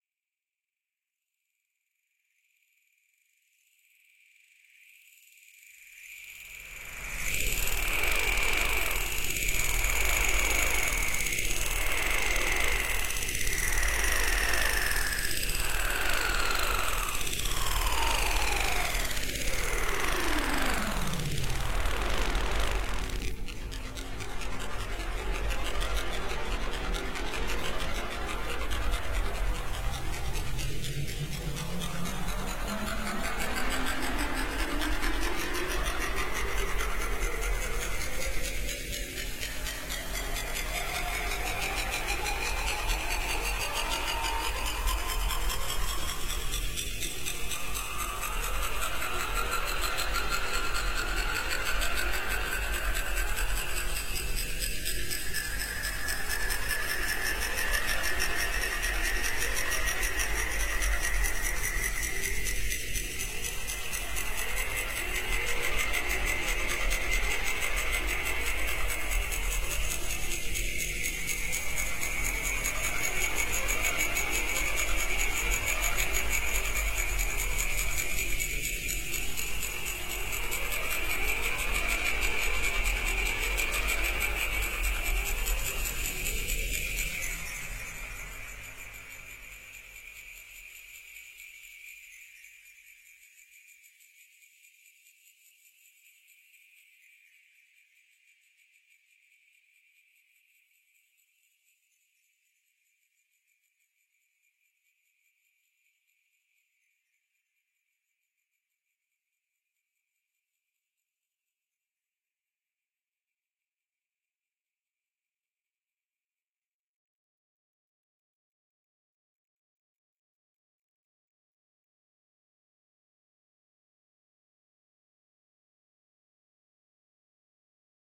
Accelerating and Decelerating Panning Pulse C3add9b5 Arppeggio
Notes as an arpeggio derived from the chord Cadd9b5 fed through a Glass Viper Synth at 120 bpm using a panning notch filter on a soundfile that has been reversed cross faded into the original soundfile stretched to its maximum.
Featured at about 6 minutes 15 on this experimental track.
sample, sound-design, oscillation, sfx, effect, sounddesign, electric, Synth, fx, future, sound, motor